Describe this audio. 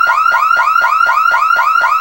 Car Alarm Sound01
I was just parking my car when the other car was hit by another and I was on a field recording mood and recorded this.
alarm, car, cars, city, field-recording, street, traffic